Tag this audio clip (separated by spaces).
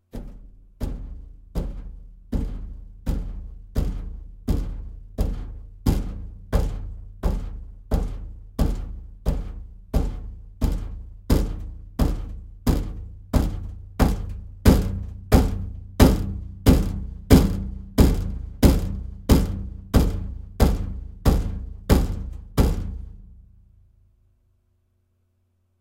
beat bong dryer machine steel thump washer washing